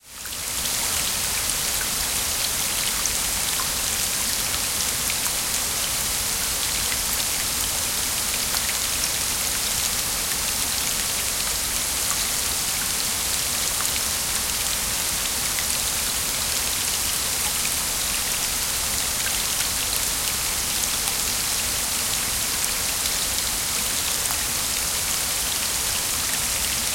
Waterfall.Big.A perspective from a small cave(15lrs)
Waterfall sound design. Mixed from several recordings of waterfalls, the murmur of springs and rivers. Equalization, a bit of convolutional reverberation and a different width of the panorama were applied to give certain shades and planality. Low frequency rumble has also been added.
I ask you, if possible, to help this wonderful site (not me) stay afloat and develop further.
Big, Fall, Stream, Waterfall, ambience, ambient, artificial, atmos, atmosphere, background, background-sound, bubblng, environment, field-recording, flow, flowing, gurgle, gurgling, huge, large, murmur, nature, noise, river, sound-design, soundscape, water